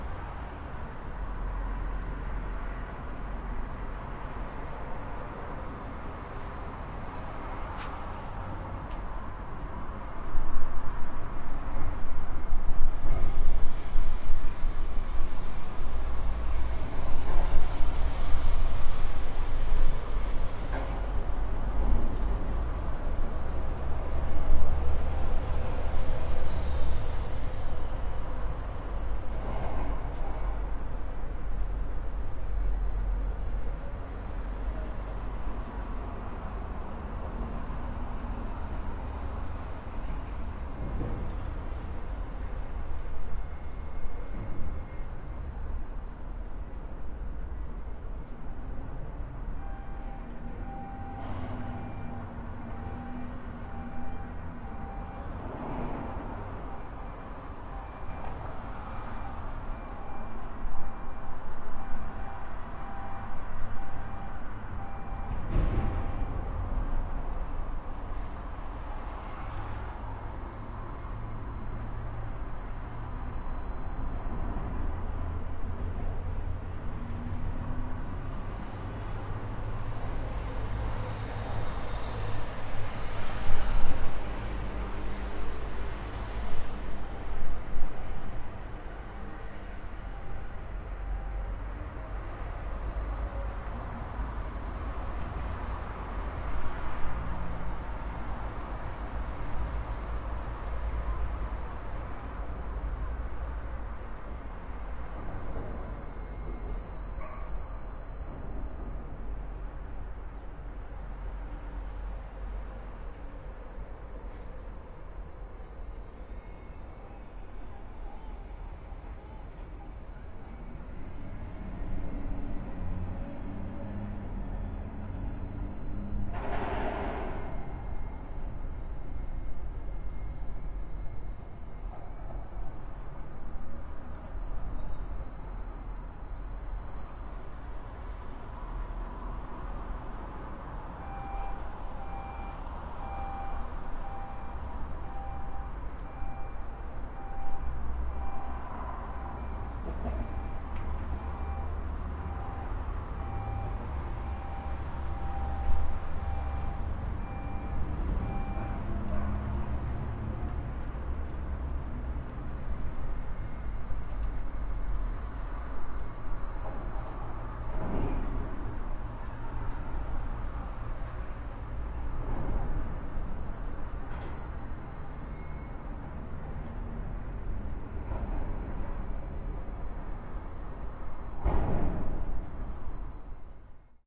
Port on sunday
Sound of traffic from nearby road and container terminal, recorded from my apartment on a lazy sunday morning.
ambiance, birds, cargo, cars, containers, crane, f4, field-recording, oktava, port, rijeka, sea, soundscape, sunday, traffic, work, zoom